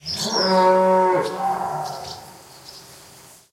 Single moo, some bird chirps in background. Matched Stereo Pair (Clippy XLR, by FEL Communications Ltd) into Sound Devices Mixpre-3
barn, cattle, cow, farm, moo, mooing